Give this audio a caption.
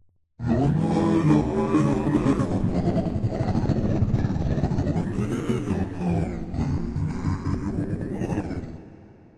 Alien Conversations
Used chants from a Native American Tribe and distorted them with various process and automation to make it sound A.L.I.E.N.